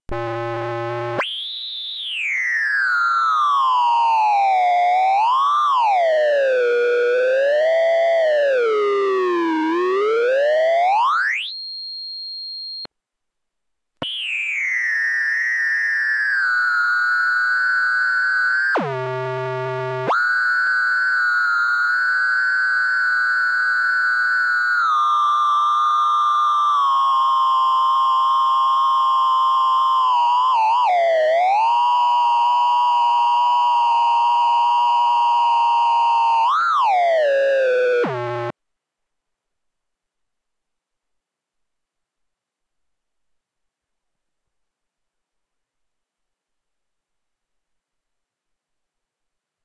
Colorino light probe, incandescent light, round bulb on & off
Pointing the Colorino at the light above my desk. The Pitch rises sharply from the resting level as I turn on the light. Slight movements of my hand give it more or less light, so the pitch varies. When it's pointed directly at the light it receives more than it can handle, like pegging a physical meter, it can't go any higher, so the AC modulation of the light isn't reflected in the tone anymore. When I turn off the light the pitch drops sharply to resting level = no light.
The Colorino Talking Color Identifier and Light Probe produces a tone when you hold down the light probe button. It's a pocket sized 2-in-1 unit, which is a Color Identifier/Light Detector for the blind and colorblind. The stronger the light source, the higher the pitch. The more light it receives, the higher the pitch. So you can vary the pitch by moving and turning it.
Recorded from line-in on my desktop using Goldwave. Low-pass filter was applied to lock out the 16khz sampling frequency.